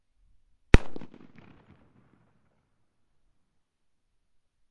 Date: ~12.2015 & ~12.2016
Details:
Recorded loudest firecrackers & fireworks I have ever heard, a bit too close. Surrounded by "Paneláks" (google it) creating very nice echo.
Bang, Boom, Explosion, Firecrackers, Fireworks, Loud